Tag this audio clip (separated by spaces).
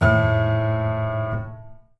piano twang percussion